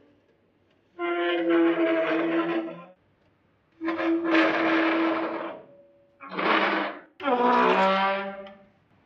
Cadeira arrastando
uma cadeira sendo arrastada.
Cadeira, Moveis, Arrastar